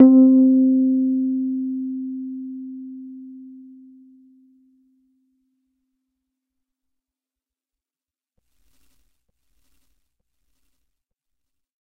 C S Rhodes Mark II C3
Individual notes from my Rhodes. Each filename tells the note so that you can easily use the samples in your favorite sampler. Fender Rhodes Mark II 73 Stage Piano recorded directly from the harp into a Bellari tube preamp, captured with Zoom H4 and edited in Soundtrack.